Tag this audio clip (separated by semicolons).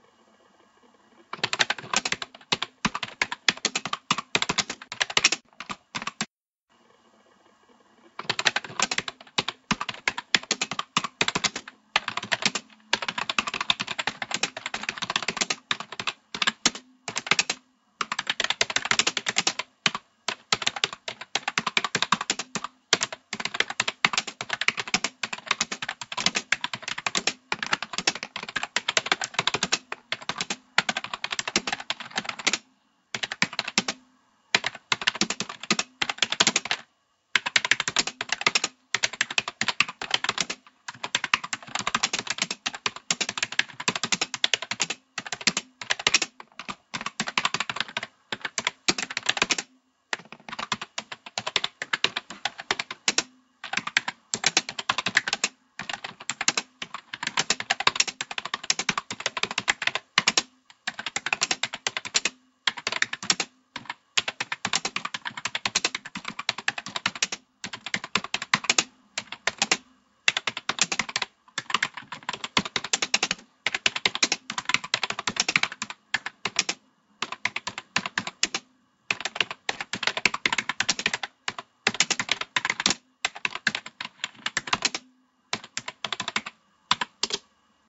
click; computer; key; keyboard; keys; type; typing